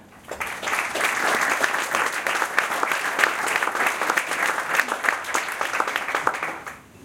Clapping at a small event in Portland OR, recorded on Tascam DR07
Clapping, crowd, public